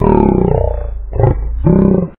demonic growl 2
snarl growl monster noise demon demonic scary eerie alien
alien, demon, demonic, eerie, growl, monster, noise, scary, snarl